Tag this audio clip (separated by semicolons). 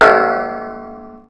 clipping; percussion